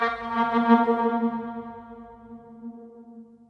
oboe signal
oboe processed sample remix
oboe, signal, transformation